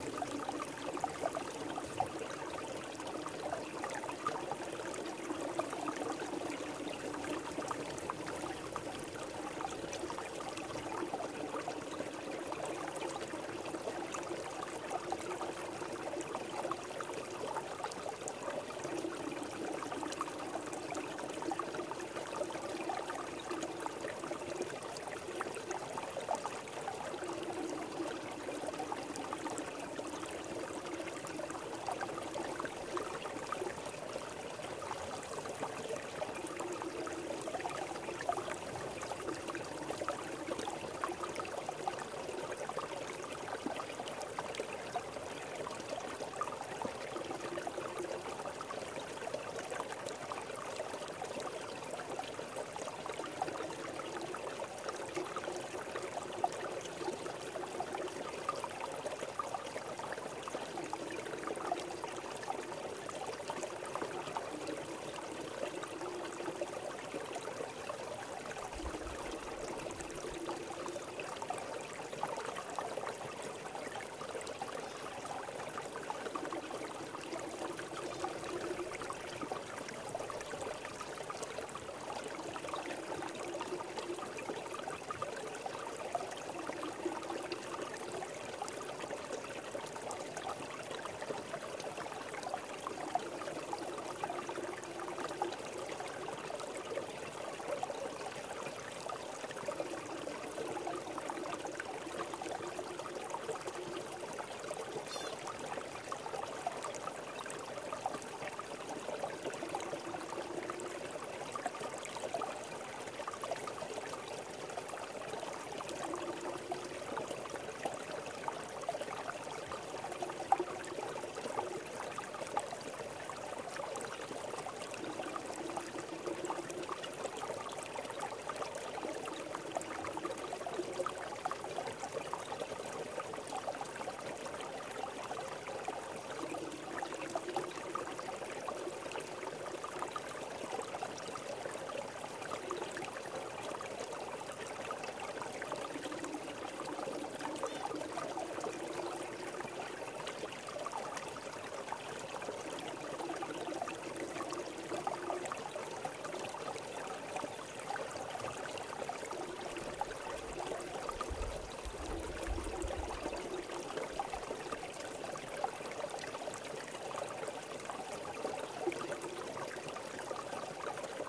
Water trickling beneath a field of boulders.